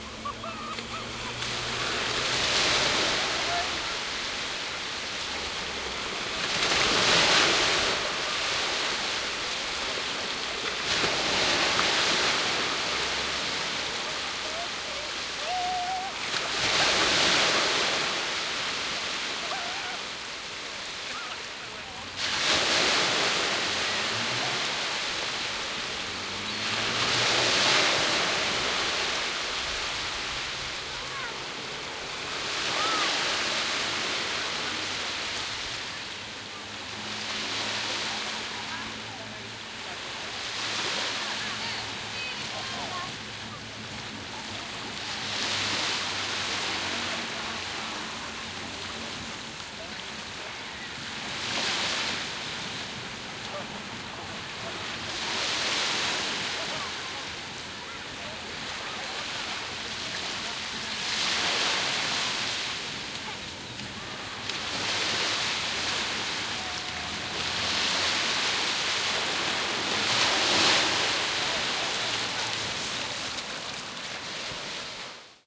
This is the sound of Weymouth beach approaching dusk, recording the sea gently encroaching further inward.